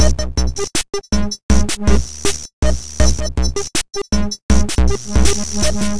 Odd sounding drum loop. No additional effects used.
Thank you very much in advance!